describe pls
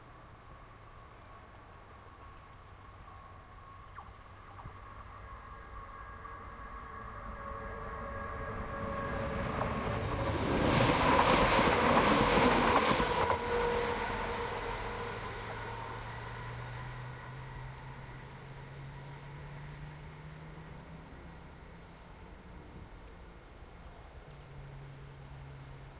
Content warning
The recording was made by the tracks not not at the train station. I recorded this on a trail near the Firth of Forth. Waiting for the train :) I think I can hear the sound of the tracks and the passing train with interest. Enjoy.
rail, rail-road, railroad